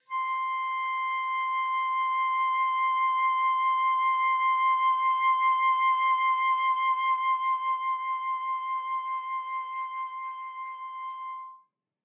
One-shot from Versilian Studios Chamber Orchestra 2: Community Edition sampling project.
Instrument family: Woodwinds
Instrument: Flute
Articulation: expressive sustain
Note: C6
Midi note: 84
Midi velocity (center): 31
Microphone: 2x Rode NT1-A spaced pair
Performer: Linda Dallimore